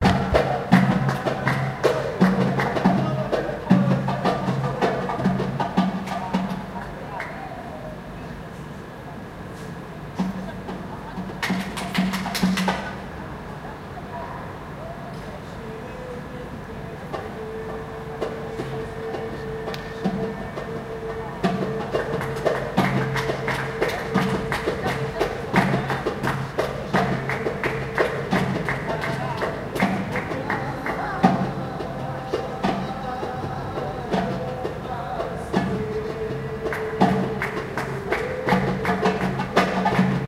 Drums on Middle-eastern holiday
Drums on the street.
drums, east, live, street